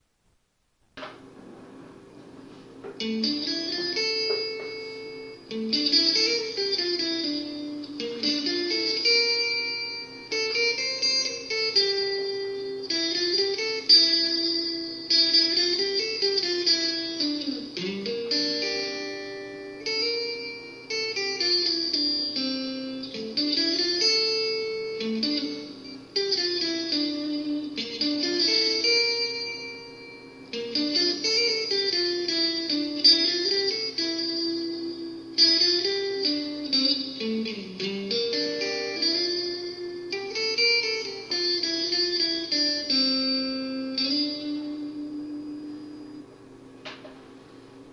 Teks Sharp Twangy Guitar
Messing around on an electric Guitar with a very sharp sound and some distortion.
Echo, Guitar, Sharp